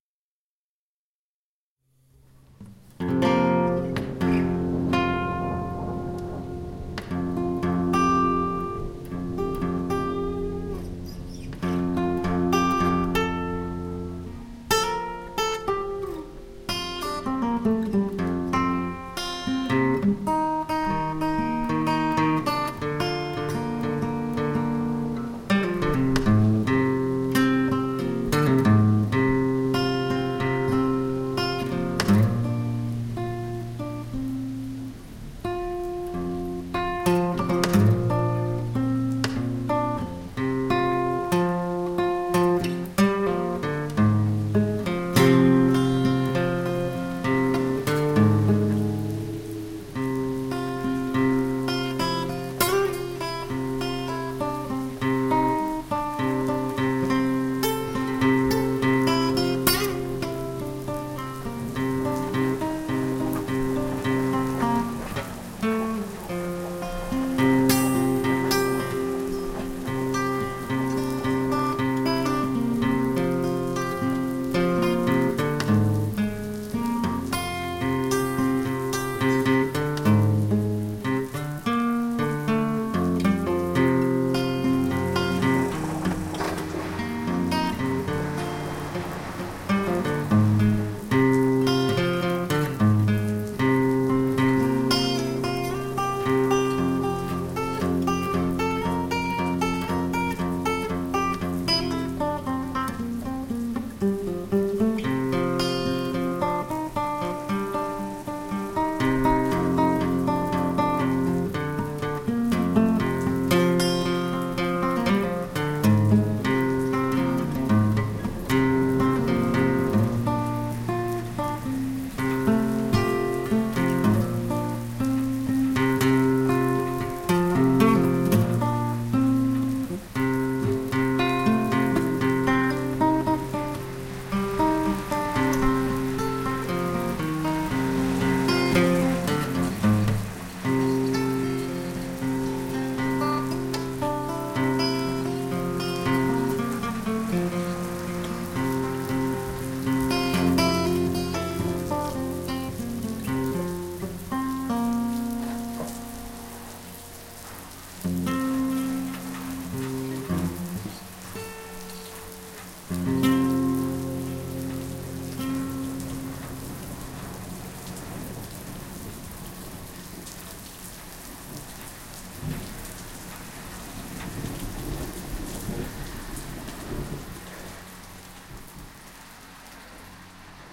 Guitar across the storm, rain and washing machine
An acustic guitar improvising with a storm and the washing machine accompanying. Recorded with a Zoom H1.
acoustic, guitar, storm